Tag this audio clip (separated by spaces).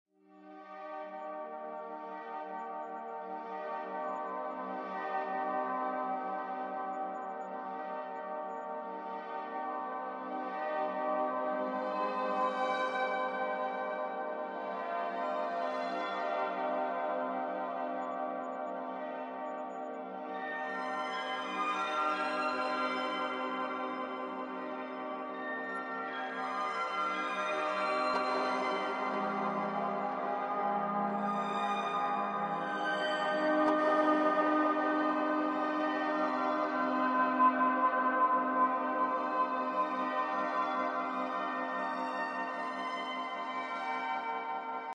Experience Heart Home Life Love Passion Stars Togetherness Universe Warmth